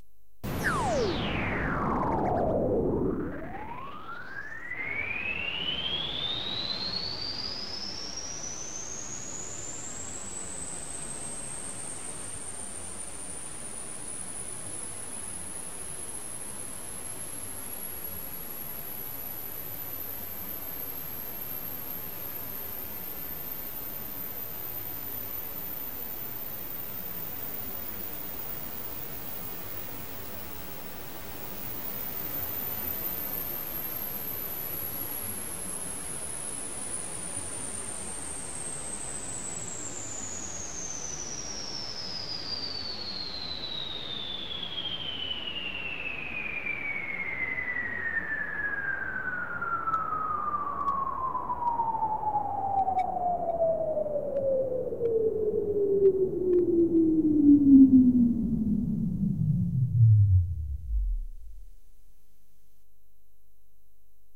Space-time tranfer 3 - tranfering into air, falling sound. done with clavia nordlead 2 and recorded originally with fostex vf16 multitrack recorder
falling, imaginary, scifi, space-time-tranfer, synthetic